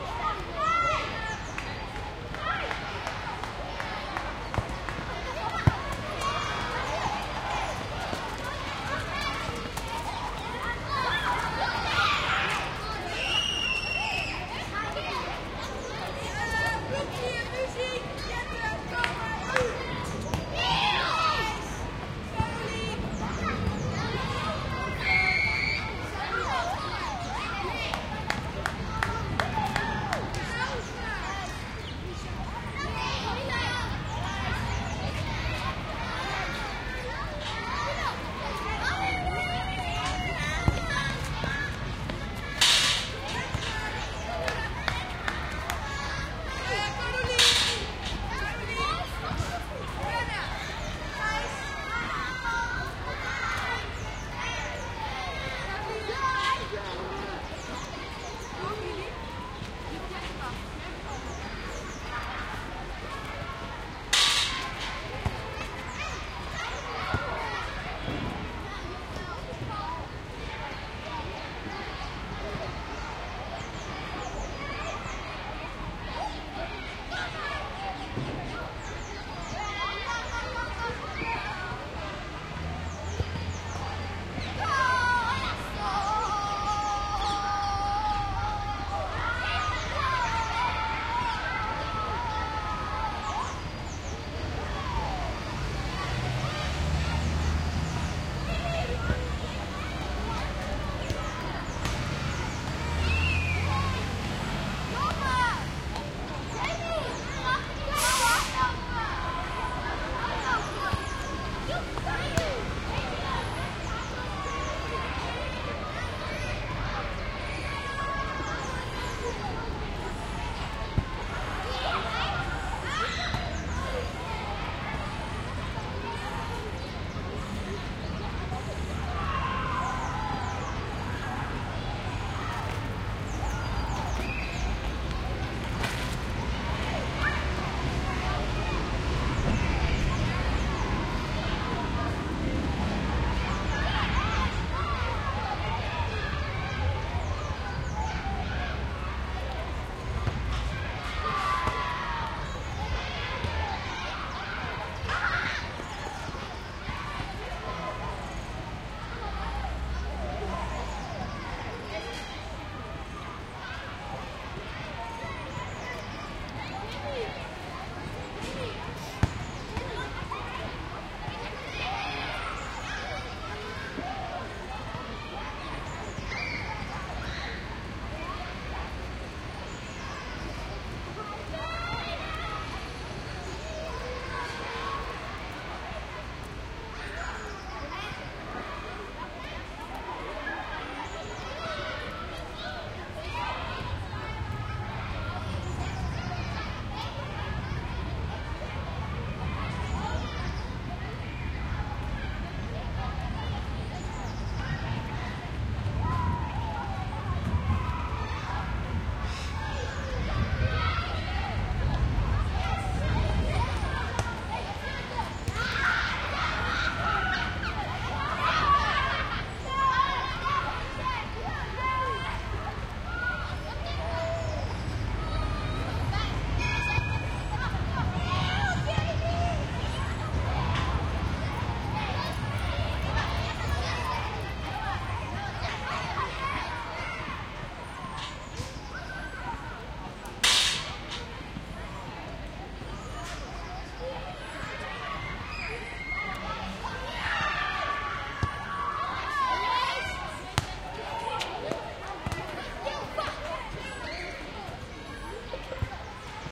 playground urban park square day camp with children playing distant traffic and nearby tram passing with rumble by sometimes Amsterdam
Amsterdam, children, day, park, playground, playing, square, urban